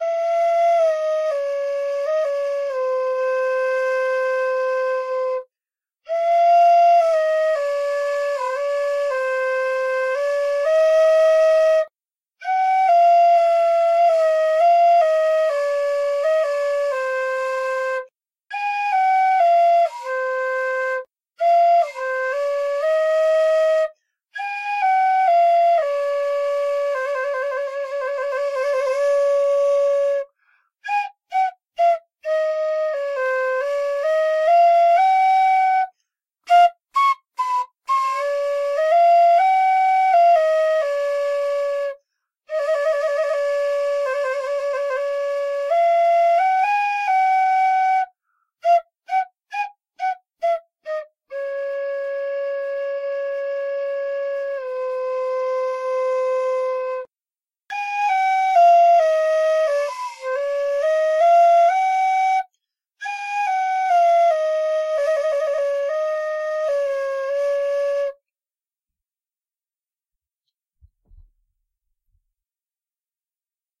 Poorly Played Flute
Poortly played melodies on a wooden flute I snagged from a vendor at a Mayan temple.
lo-fi, poorly-played, wooden-flute